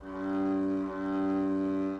train clackson
metro, sound, train